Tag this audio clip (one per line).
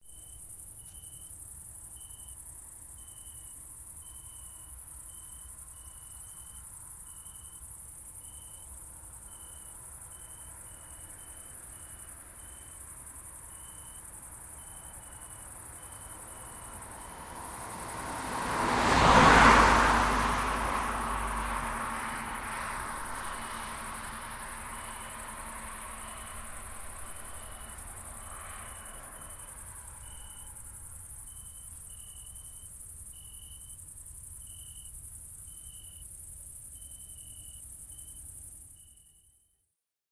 car
hungary
crickets
night
summer
cicades
passing-by